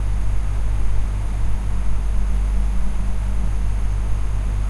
mrecord06 centrifuge edit lpm
Loop of a heat pump engine running.